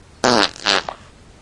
fart poot gas flatulence
gas, poot, flatulence, fart